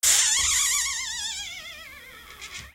Recording of the hinge of a door in the hallway that can do with some oil.
hinge, creaking, creak